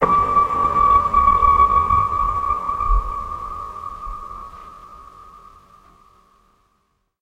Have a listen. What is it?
The Raetis is a balloon like plant that floats far above the surface, this sound is used to locate other Raetis, as the noise will trigger a reflexive response to make the noise.
(the last one sucked, so I made this instead)